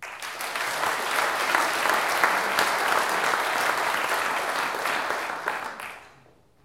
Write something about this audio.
Medium Crowd Clapping 6
A medium sized crowd clapping for a speaker who just presented. Recorded on the Zoom H4n at a small distance from the crowd.
Location: TU Delft Sports & Culture Theater, Delft, The Netherlands
Check out the pack for similar applauding sounds.
audience, group, applaud, clap, crowd, medium, applause, clapping